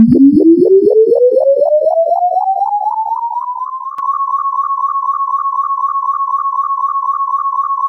Power up sine wave
Just a quick "power up" sound I made in Audacity. Just a sine wave with a pitch increase, plus a phaser effect.